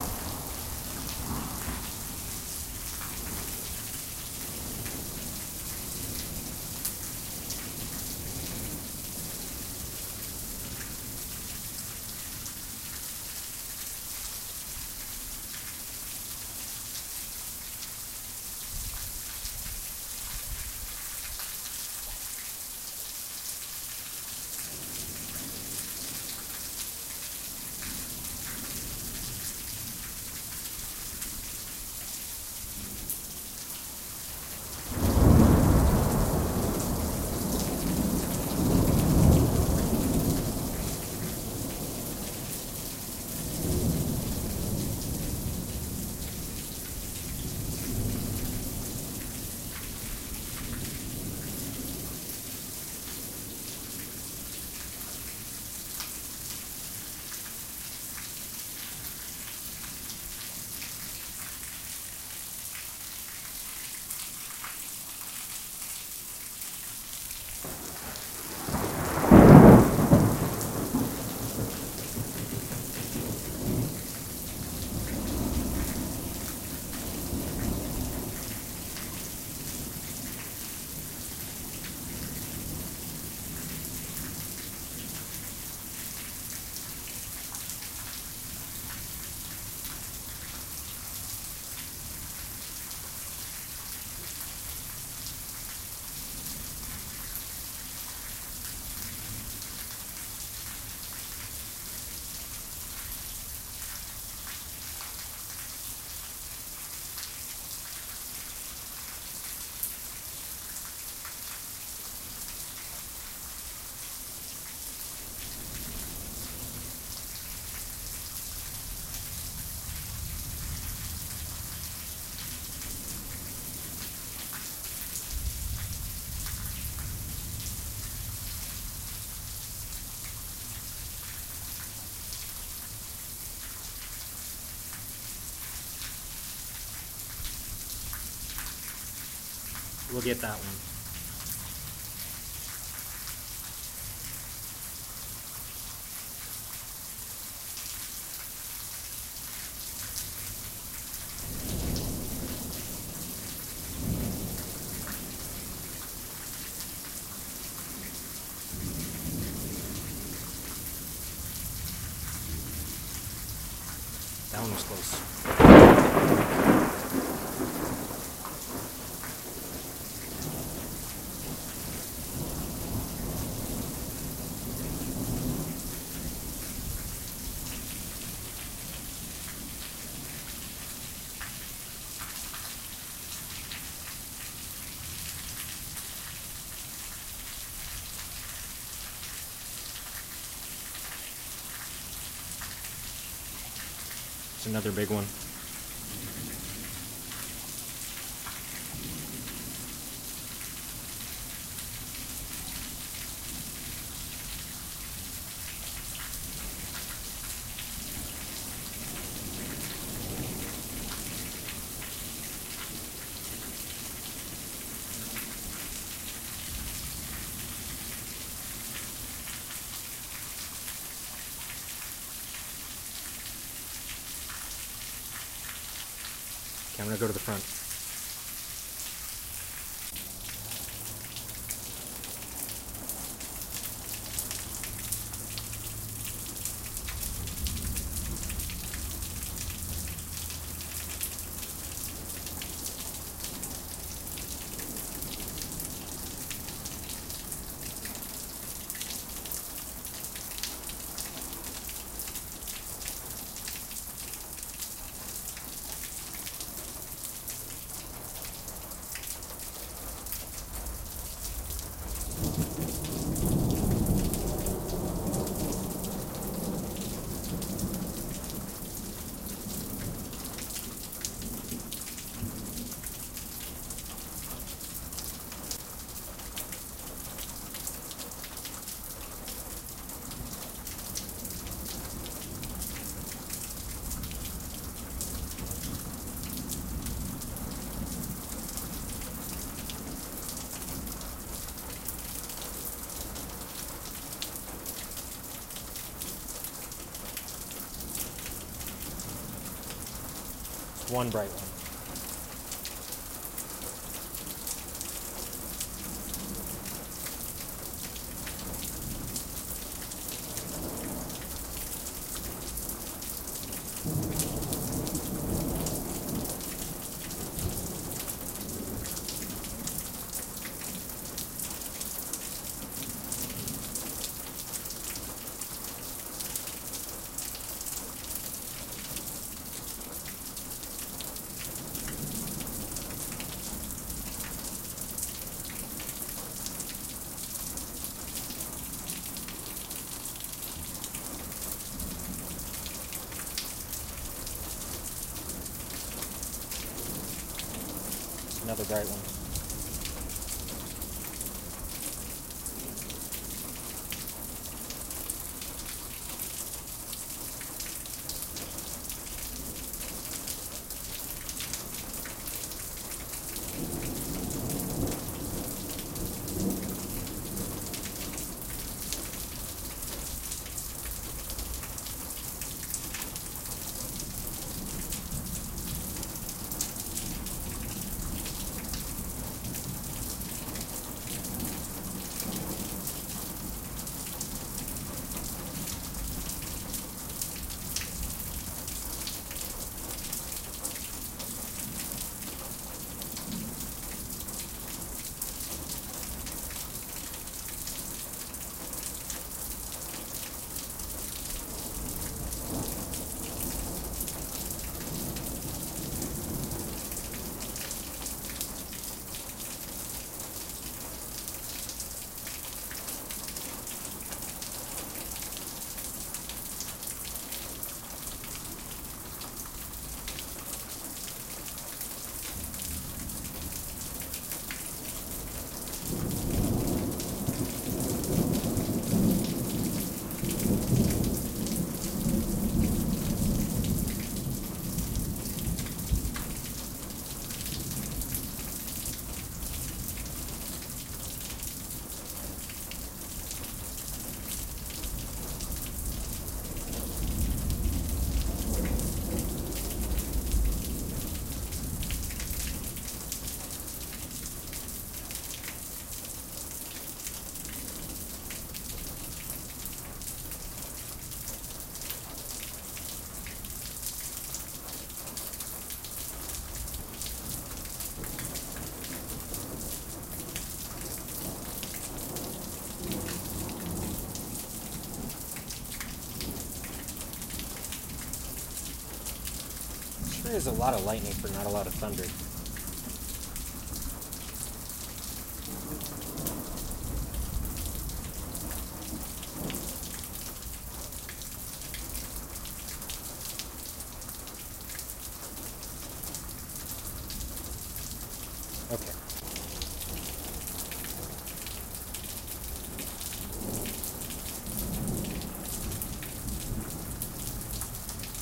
DR05 Thunderstorm
Big lightning happening in my hometown the evening of May 24th 2020. Went outside with a TASCAM DR-05 handheld recorded to try and get some thunderclaps, but unfortunately the rain and lightning were more prevalent than the thunder. Here and there I comment to myself about a particularly big flash, hoping it results in a big boom, but there aren't as many booms as I was hoping to capture in the time I had the recorder switched on.
lightning, rain, thunderstorm